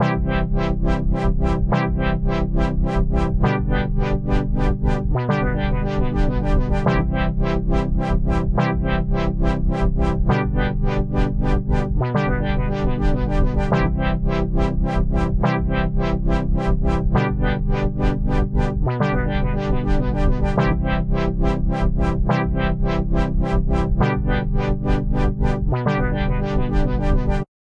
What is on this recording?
mypad1-70bpm
loop dub ambient space 70 bpm float dubstep fairground
70, ambient, bpm, dub, dubstep, fairground, float, loop, space